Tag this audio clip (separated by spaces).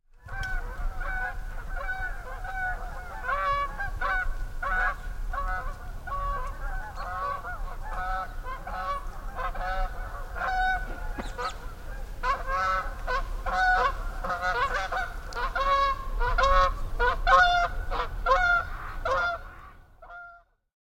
geese over